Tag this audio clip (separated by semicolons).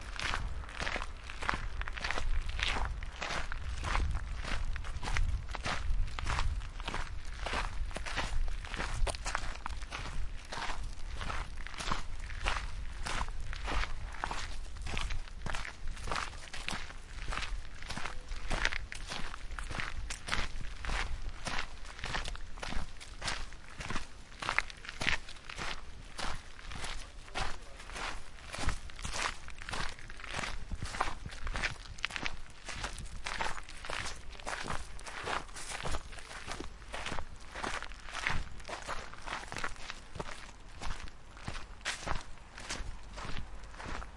steps; dirt; walk; walking; footsteps; road; gravel; path